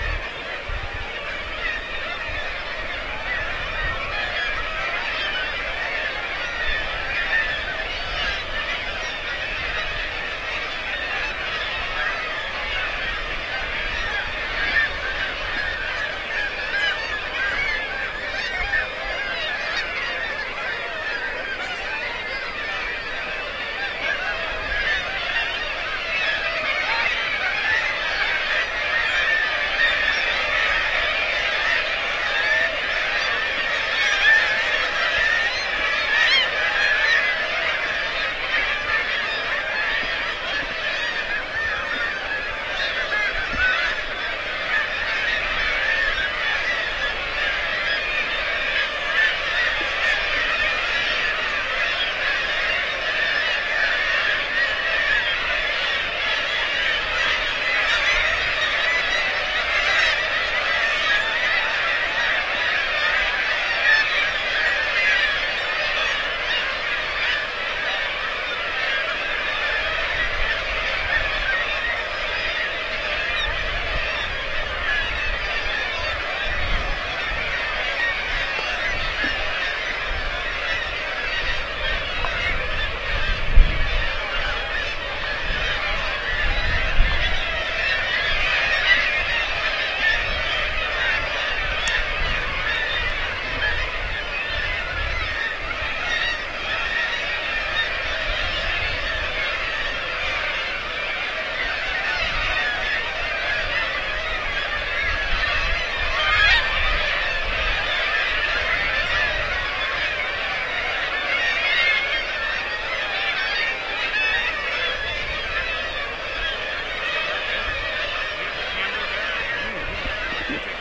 svalbard gnaalodden nearer 20060903
recorded below bird cliff Gnaalodden, Svalbard. Distance 50 meters. Primarily Kittiwakes. Marantz PMD 671. Sennheiser stereo handmic.
cliff, bird